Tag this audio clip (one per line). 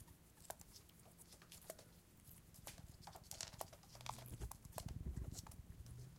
hedgehog
patter
pitter-patter
small-animal
steps
walking